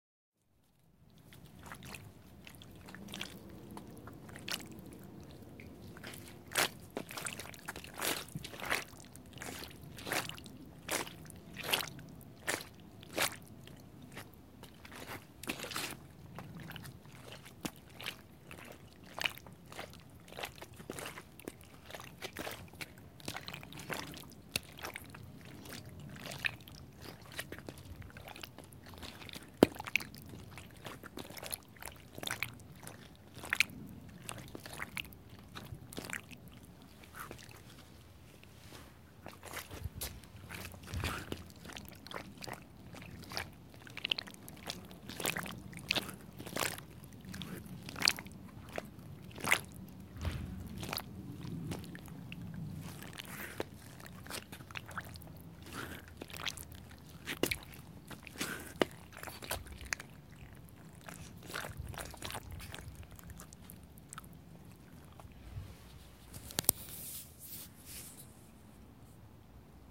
A stepping-stone in our garden was complete immersed in mud and made sluggy noises when stepping on it from different angles.